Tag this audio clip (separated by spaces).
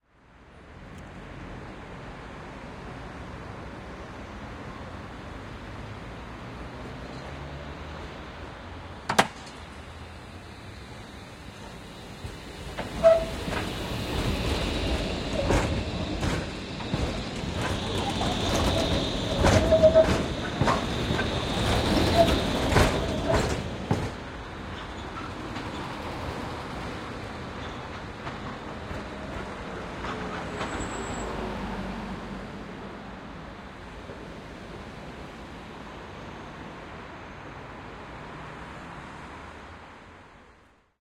ambient-ate-208 croatia dpa-4017 ms-stereo pass-by sd-552 stereo tram tram-pass-by urban zagreb